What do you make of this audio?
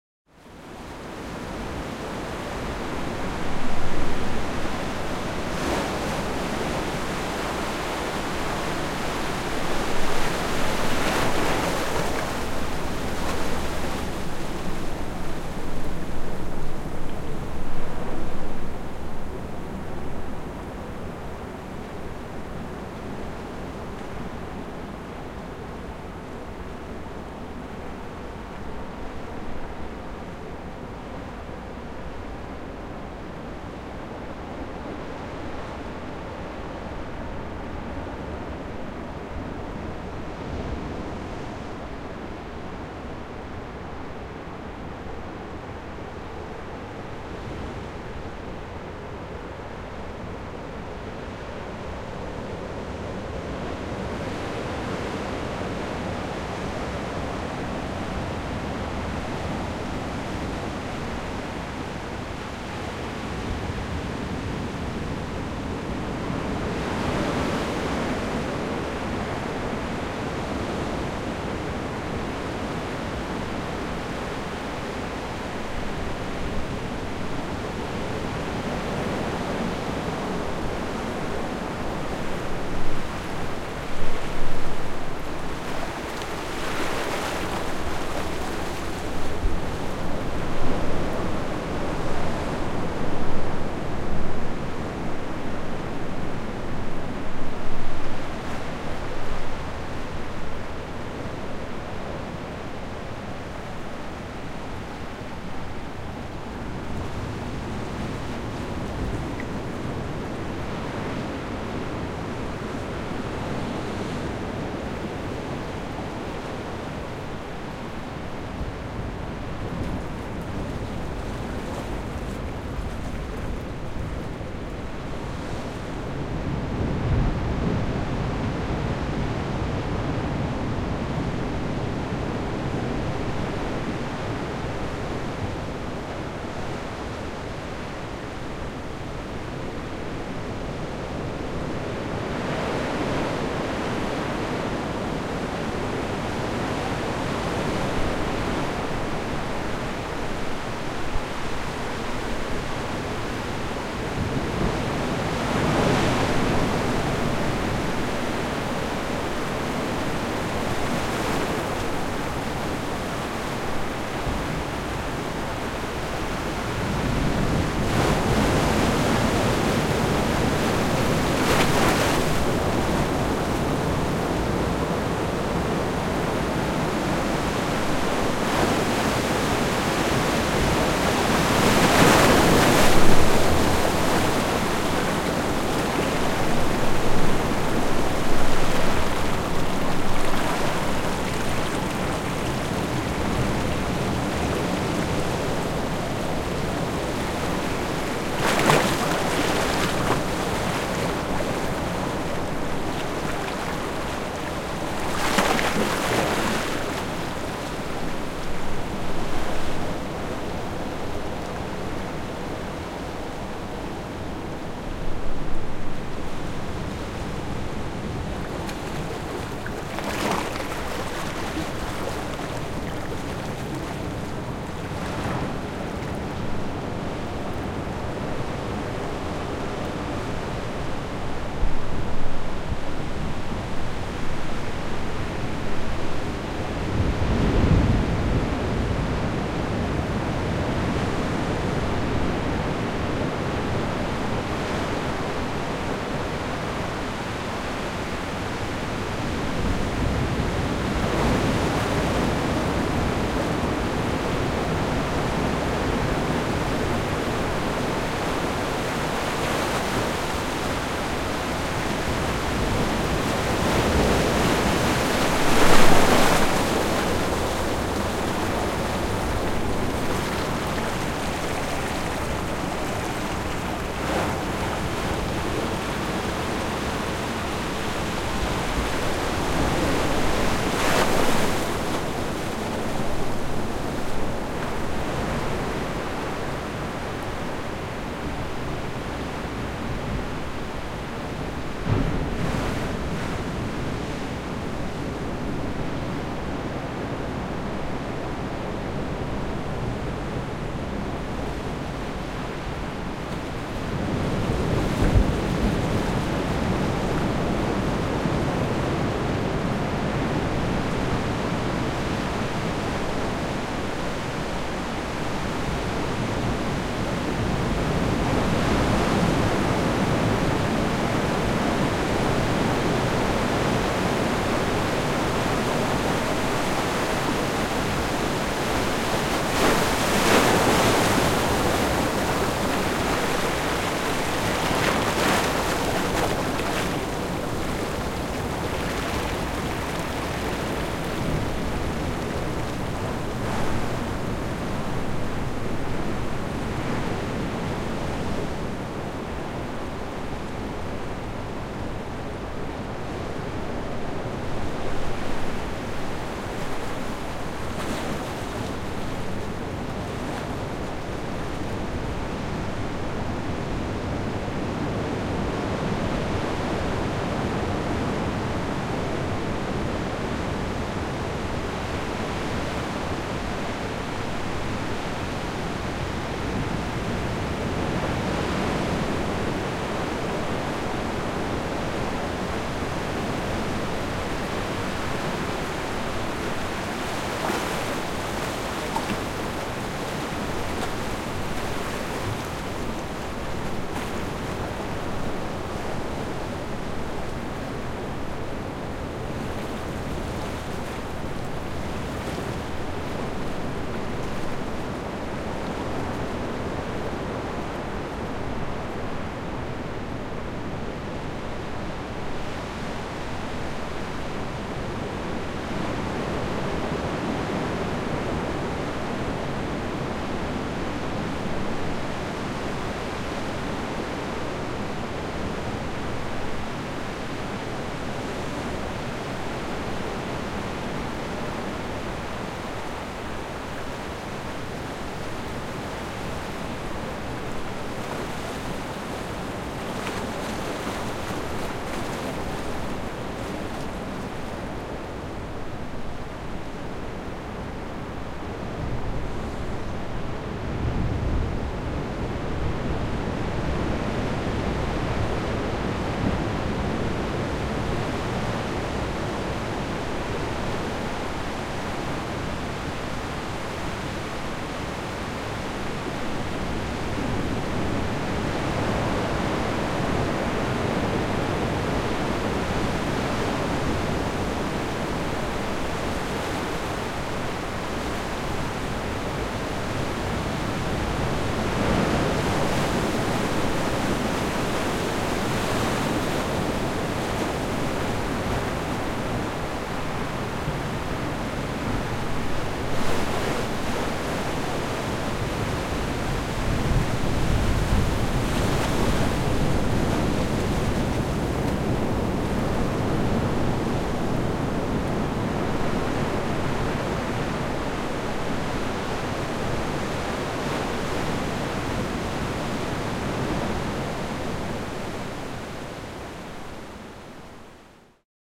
Roaring Ocean
Recorded with two stereo mics. An MS rig consisting of a Sennheiser 416 paired with a MKH-30, with this i recorded a distant atmos. I also had a Rode NT4 for a closer perspective. I synced up the two tracks and mixed using Reaper.